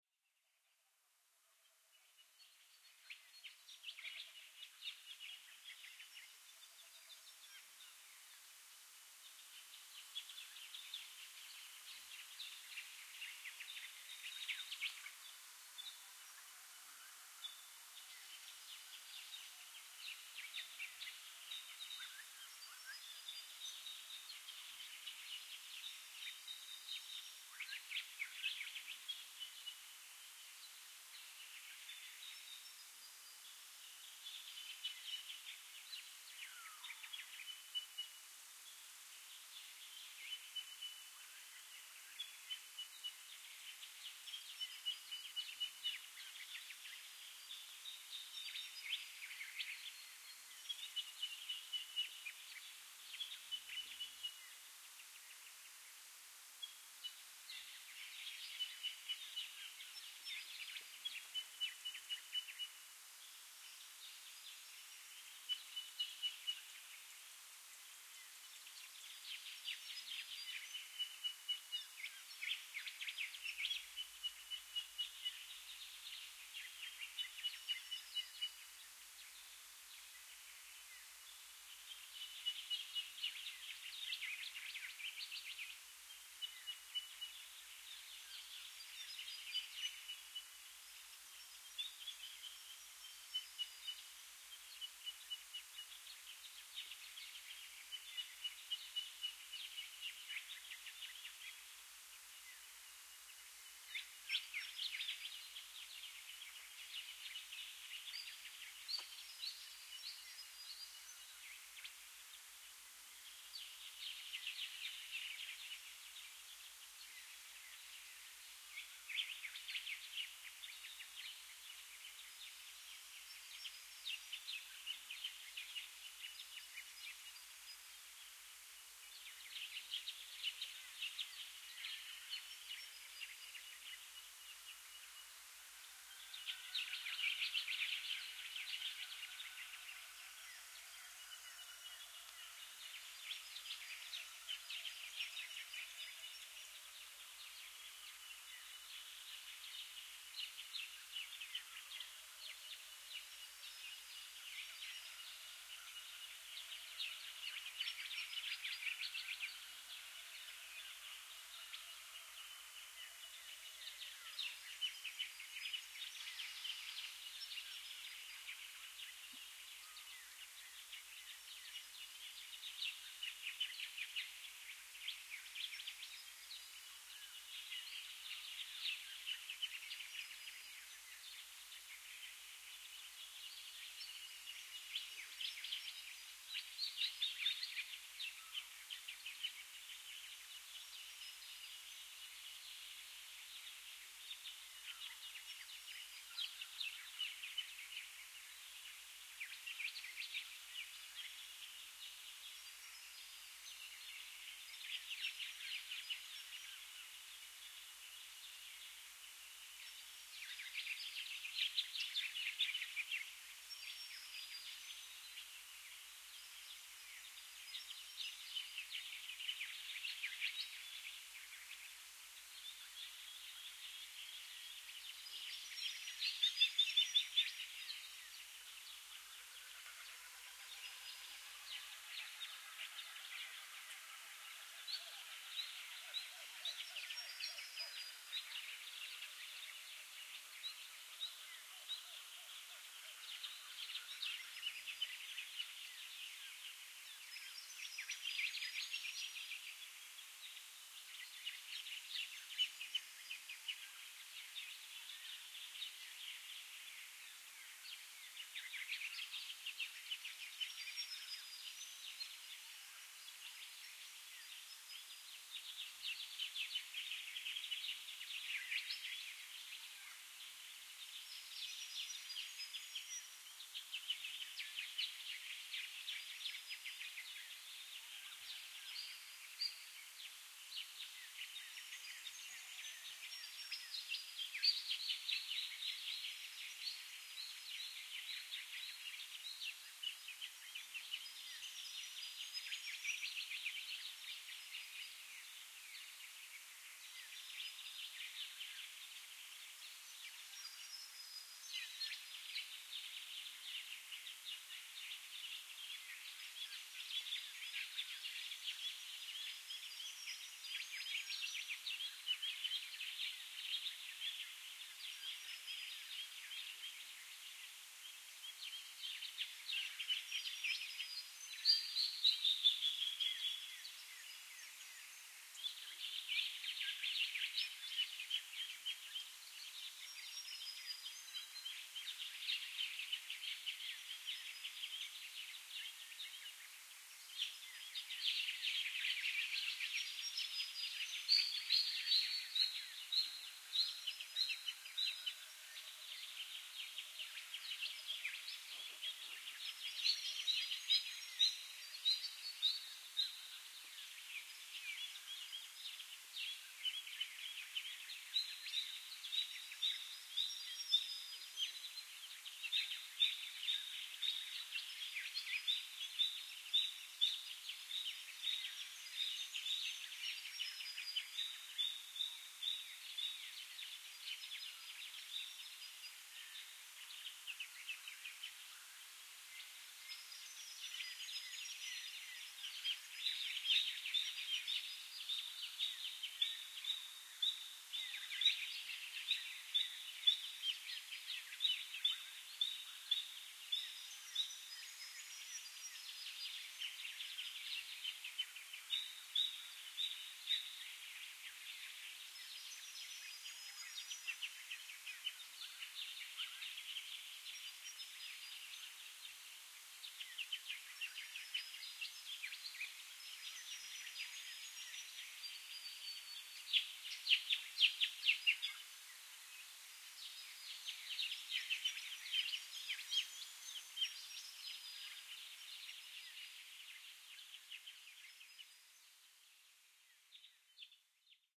Long recording of early morning birdsong from within my tent while camping. Recorded on an R-26 portable recorder. Lots of noise removal with Izotope RX6, some remains but could be good as a faint atmospheric sound.